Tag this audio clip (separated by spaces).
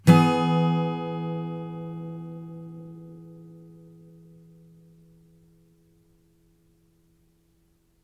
acoustic chord strummed guitar